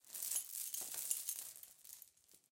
chains 15rattle
Chain SFX recorded on AT4033a microphone.